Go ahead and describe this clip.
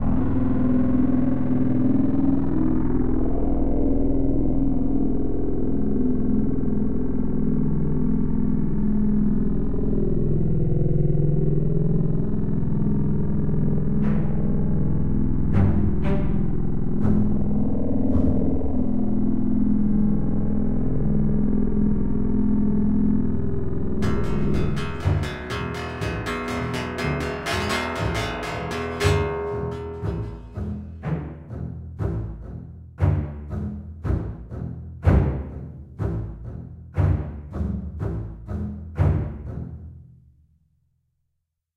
Jaws of life
Let's make this world a better place together. USe this for WHATEVER I don't care!!!!
This sounds kind of like jaws with some strings undertones... no idea what to do with this thing. TAG! you're it.
Let's turn the volume up to 11!!!! Let's all be rockstars together. :) Sounds fun, right?